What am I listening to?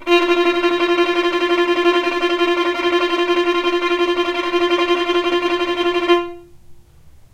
violin tremolo F3
tremolo, violin